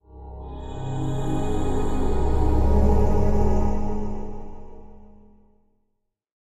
Magical portal open
Fantasy magical portal tone. Could be used to portray a dark or slightly strange portal/world, or used as a magical appear sound.
Fantasy, Magical